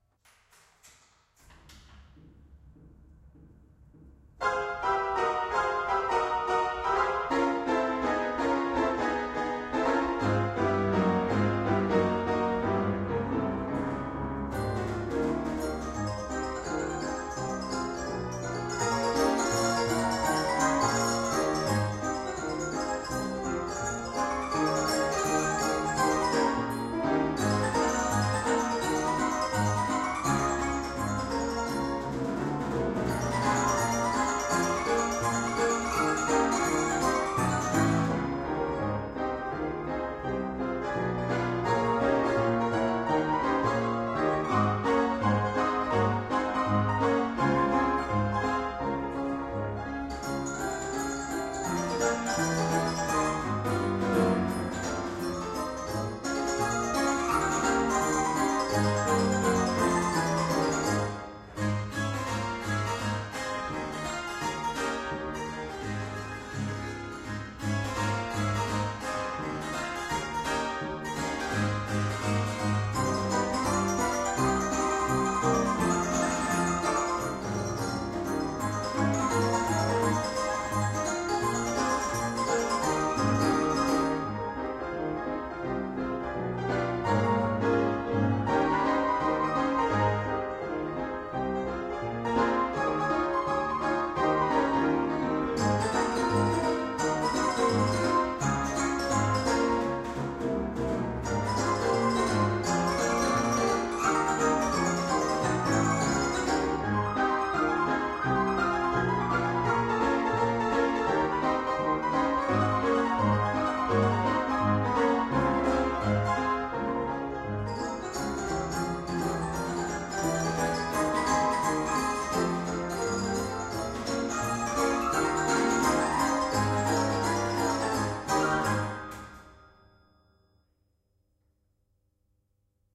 Orchestrion - I Can't Give You Anything
Orchestrion from "Imhof and Mukle 1895, Vöhrenbach, Black-Forest, Germany plays "I Can't Give You Anything But Love" by Jimmy McHugh.
Recorded in the "Deutsches Musikautomatenmuseum Bruchsal"
Recording: Tascam HD-P2 and BEYERDYNAMIC MCE82;